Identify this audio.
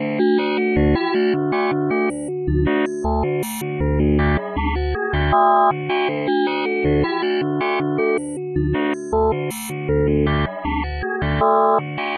A shuffled and heavily processed sample from the spectrum synth room in Metasynth. It is completely smoothed and normalized then sped up, it is nearly impossible to tell it is made from a female voice. The original input was myself singing part of the song Strangest Thing by Clare Maguire.